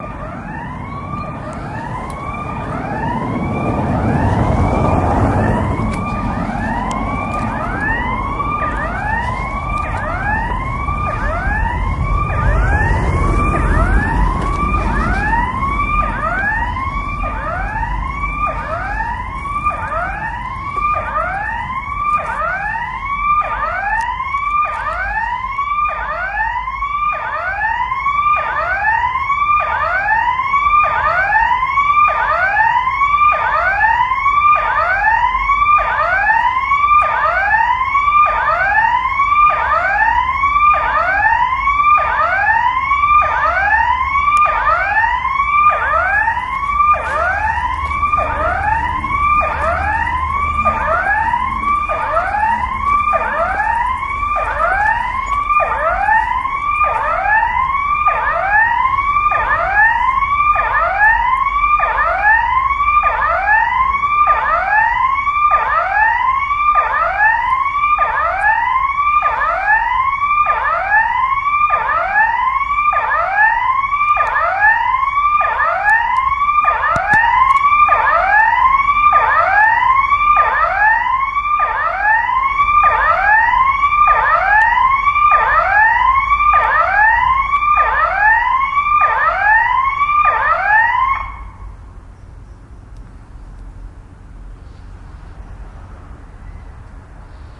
house alarm
recording of an alarm siren of a house in Berlin Dahlem, 28.7.2012
you can also hear some cars passing and the clicking of my bike as I alter my position. recorded with a Roland R-05
alarm, siren, fieldrecording